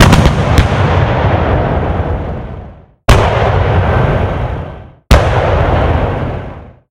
Artillery Gunfire
Artillery weapon shooting.